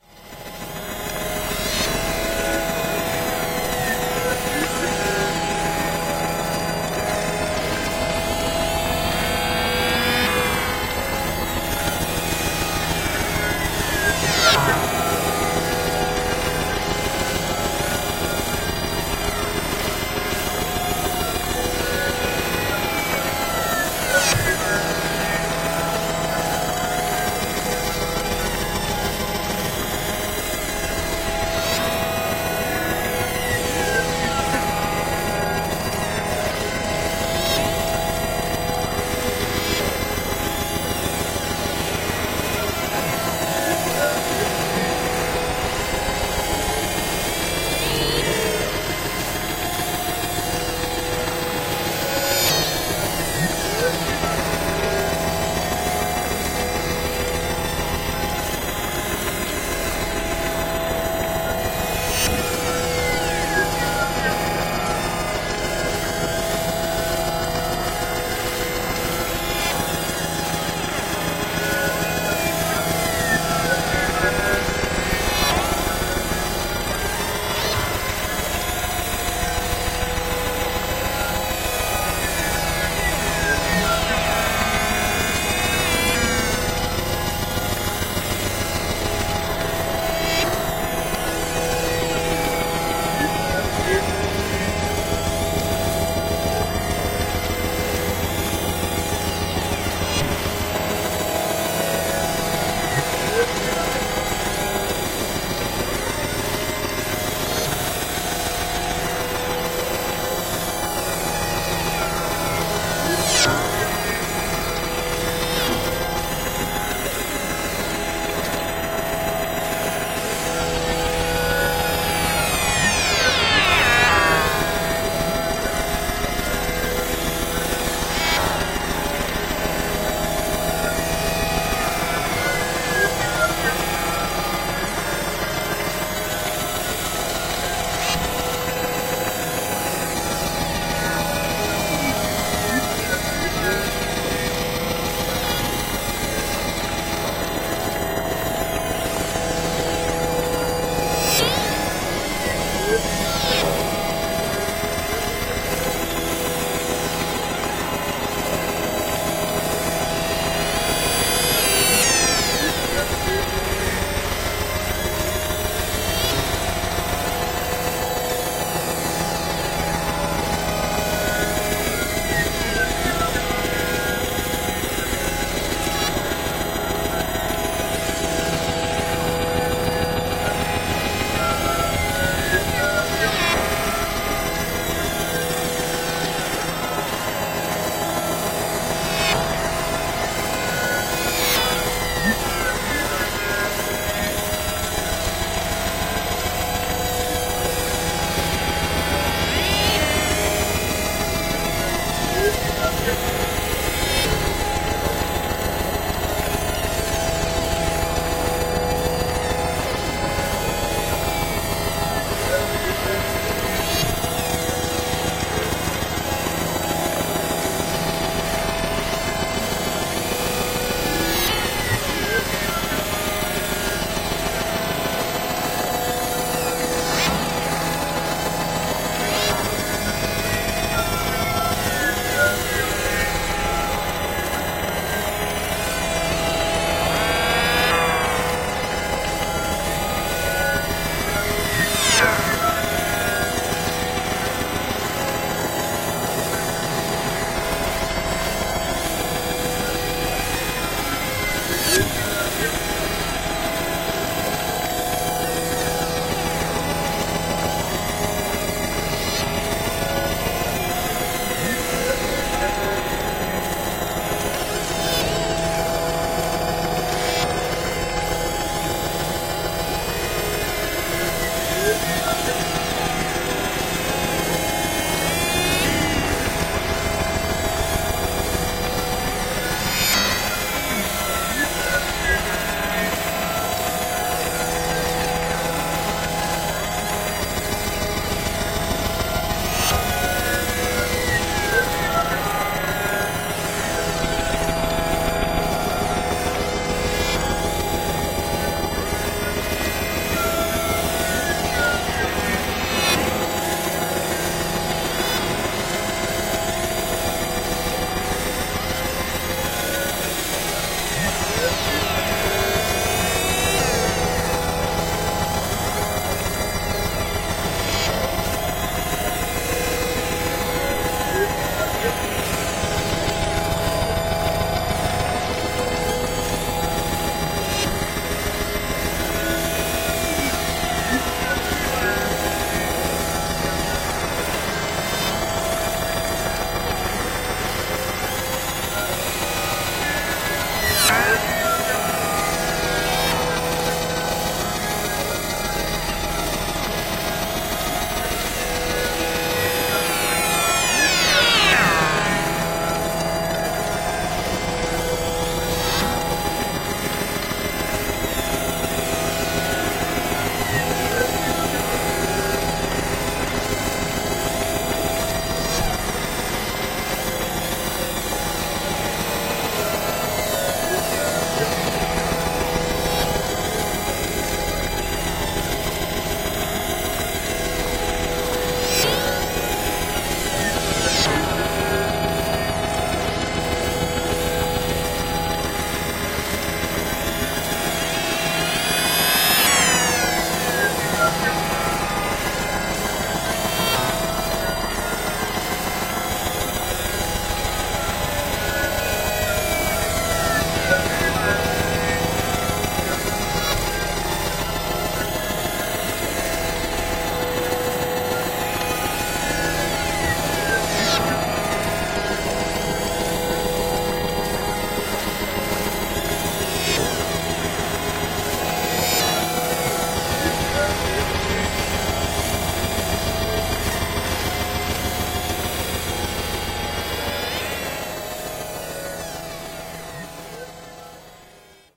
Busy high harmonics drone
A complex drone made in Audiomulch. Two matrix mixers used, each with eight different FX chains attached and randomly assigned, harmonics generators put through live sampling contraptions and much fx abuse followed in a hopefully coherent sound idea of a high pitched harmonics drone with many micro audio elements going on.
audiomulch, drone